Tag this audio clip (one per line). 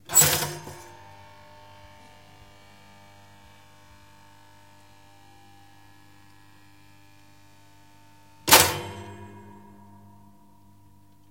household
kitchen
toaster